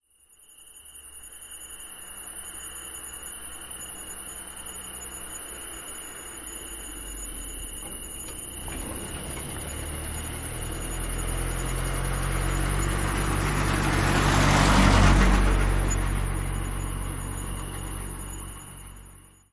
Son d’une Renault 18 Break. Son enregistré avec un ZOOM H4N Pro et une bonnette Rycote Mini Wind Screen.
Sound of a Renault 18 Break. Sound recorded with a ZOOM H4N Pro and a Rycote Mini Wind Screen.